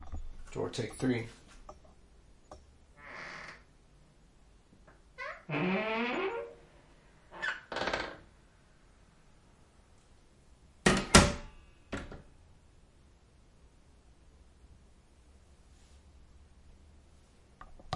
AAD Door Creak 3
Close, Closing, Creak, Creepy, Door, House, Old, Open, Squeak, Wood